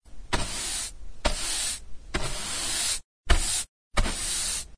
I2 wooden broom
sweeping the floor with a classic wooden broom